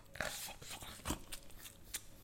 Eating sounds by a person.
munching, eating, crunch, chew, munch, chewing, chomping, eat